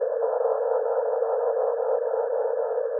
More coagula sounds from images edited in mspaint.
ambient, space, synth, talking